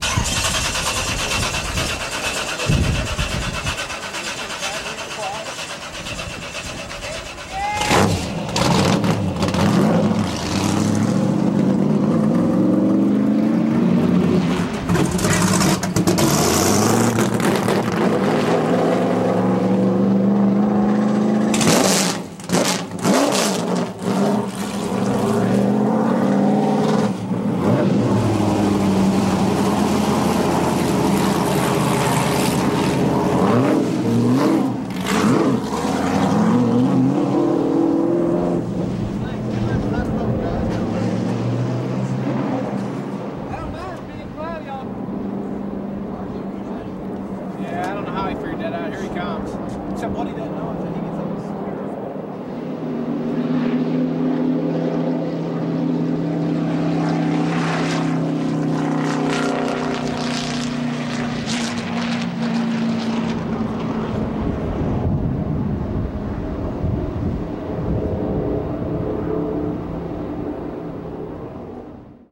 A second recording of Nascar Ambience - less than a dozen Nascar racecars starting and you hear one drive by. This was recorded on March 27 1998 in the pit area of Texas Motor Speedway. The event was a Team Texas Driving school - where participants are able to drive real Nascar racecars. The sounds were recorded along with video footage on a Beta SP camcorder using a single Sennheiser short gun directional microphone. It was digitally captured with an M-Audio Delta soundcard from the BetaSP source tapes.